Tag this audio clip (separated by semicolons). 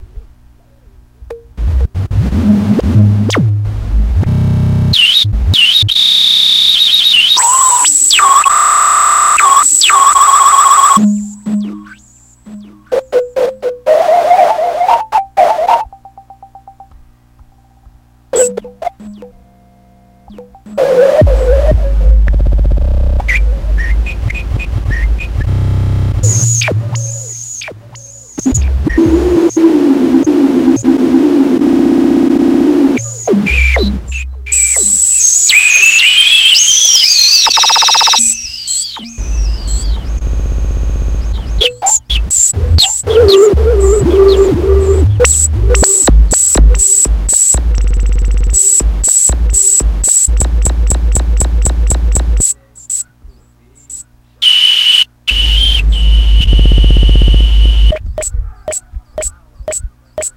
kaoss; musik; noisy; processed; vocals; weird